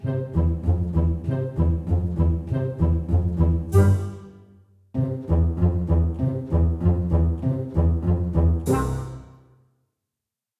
Enjoy the use of our sound effects in your own projects! Be creative and make a great project!
animation, background, background-sound, blasts, boom, cartoon, cinematic, comedian, comic, comical, droll, fairy, film, fun, funny, guns, hollywood, joke, joking, movie, odd, orchestral, peculiar, Rockets, shot, toon